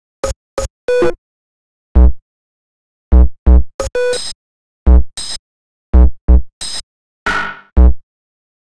This loop has been created using program garageband 3 using a drum kitharder of the same program